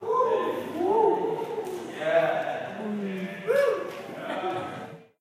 Crowd Woos
A crowd of people cheering, not very excited. Recorded in a temple with an iPhone after an announcement. The guy tried to make it sound exciting, so this is how the crowd responded.